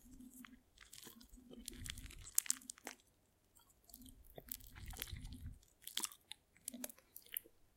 banana crushing v2
Smacking of a crushed banana (closeup recording)
banana, Close, crushing, recording, smack, smacking